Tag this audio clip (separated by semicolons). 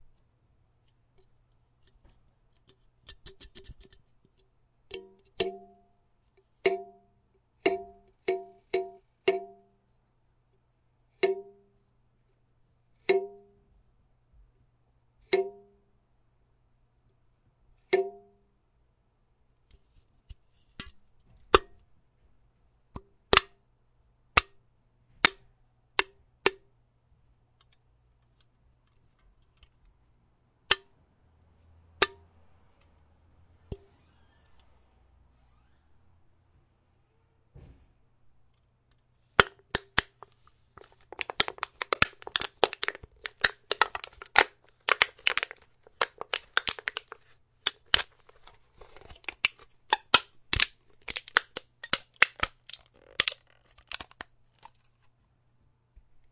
Can; crinkle; crush